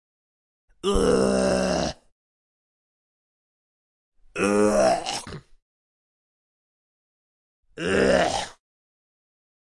10 - Vomit scream male
The scream when you vomit, no water or bathroom ambience
Panska, vomit, Pansk, CZ, Czech, human, scream